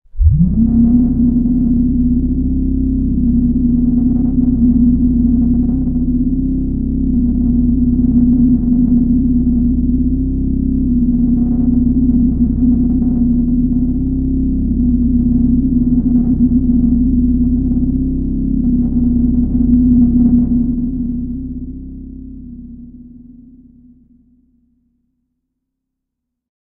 ANALOG DRONE 5
A drone sound i created using a DSI Mopho, recorded in Logic.